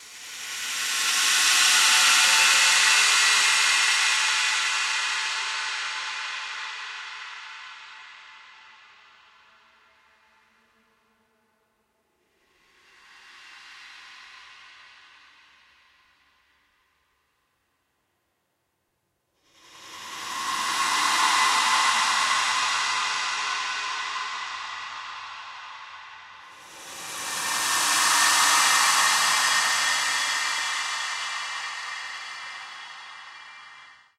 Original track has been recorded by Sony IRC Recorder and it has been edited in Audacity by this effects: Paulstretch.
creepy, demon, fear, fearful, ghost, haunted, horror, nightmare, scary, slender, survival-horor